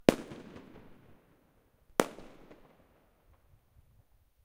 019 Fireworks, Wind
Date: ~12.2015 & ~12.2016
Details:
Recorded loudest firecrackers & fireworks I have ever heard, a bit too close. Surrounded by "Paneláks" (google it) creating very nice echo.
Bang, Boom, Explosion, Firecrackers, Fireworks, Loud